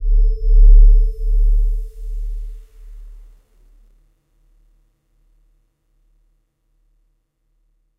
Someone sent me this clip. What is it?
Not that is was that important after all considering the fact that the patch itself has a grainy character in the higher frequencies... No compressing, equalizing whatsoever involved, the panning is pretty wide tho, with left and right sounding rather different, but in stereo it still feels pretty balanced i think.